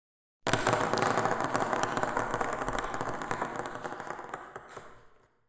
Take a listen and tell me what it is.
ping pong 2
Many ping pong balls quicking on a table with reverber recorded witn a TASCAM DR-40
balls, pong